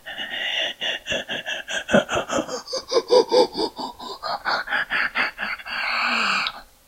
Evil laugh 07 - Gen 4
New laughs for this years Halloween!
Halloween, evil